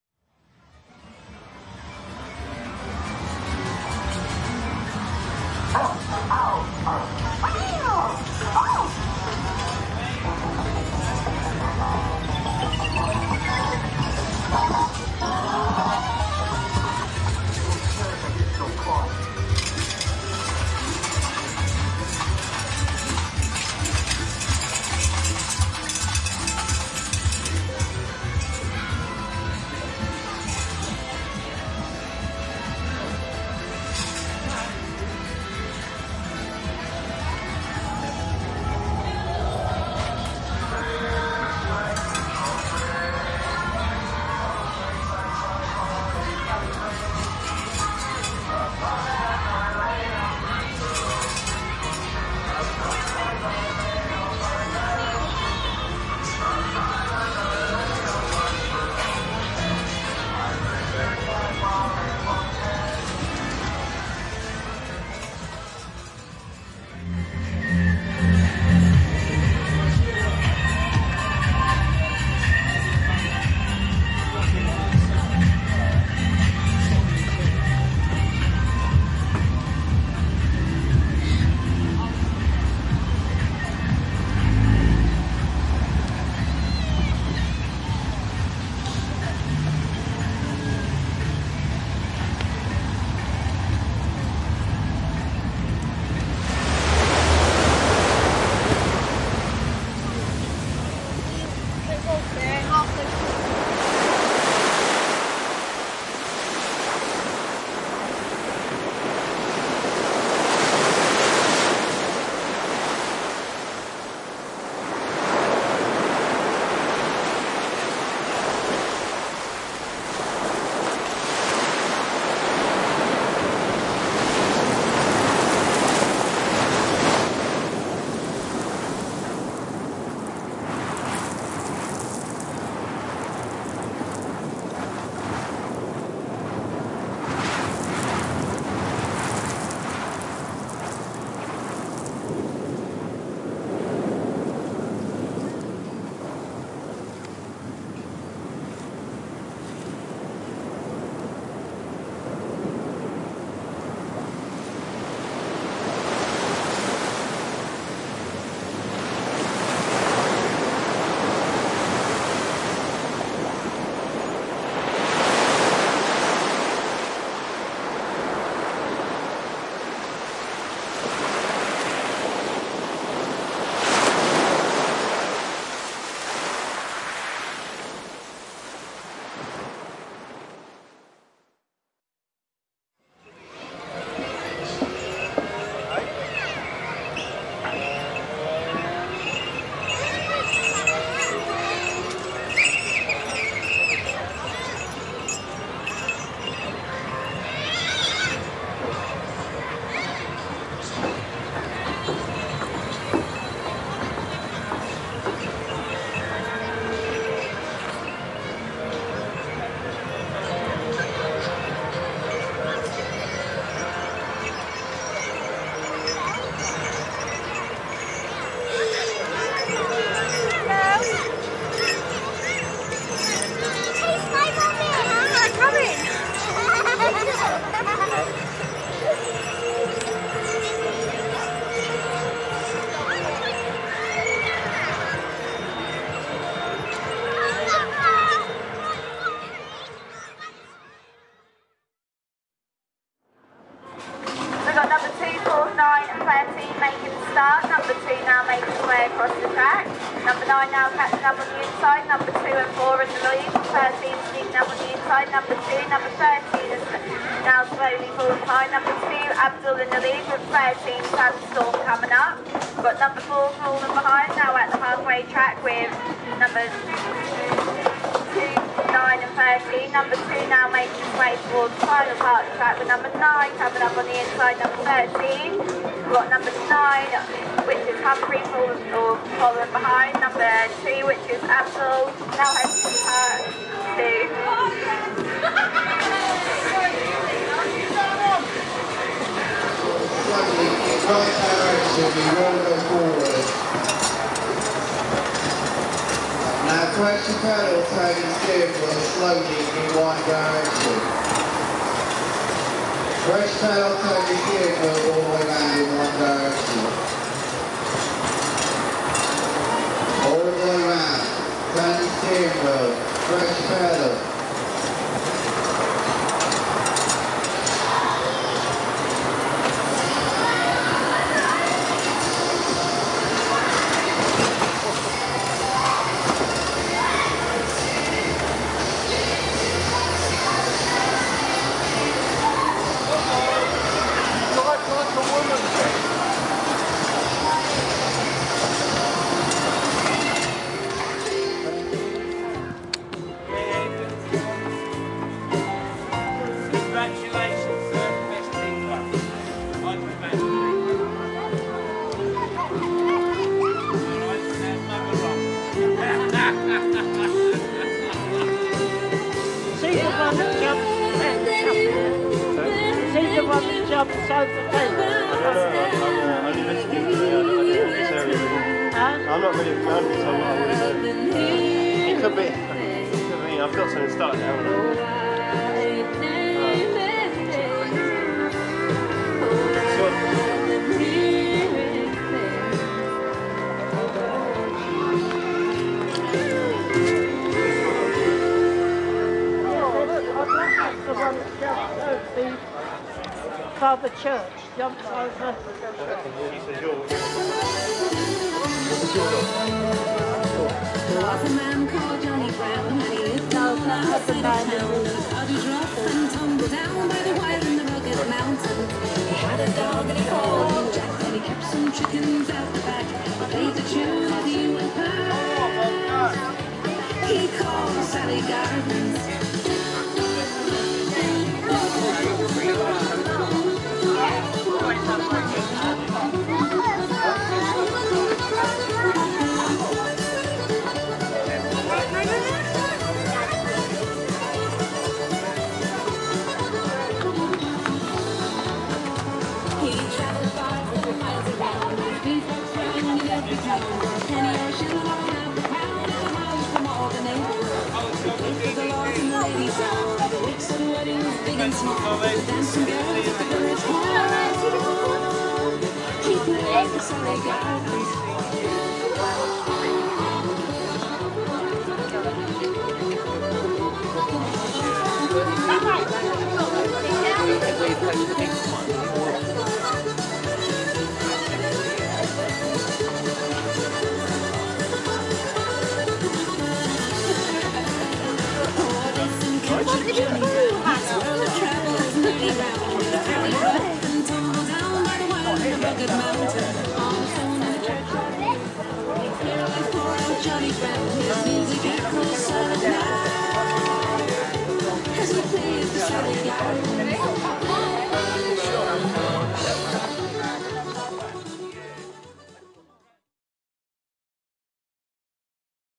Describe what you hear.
GYM1 Atmosphere of arcades at Great Yarmouth seaside waves
Crowds, arcades, waves bells, people, announcers and atmosphere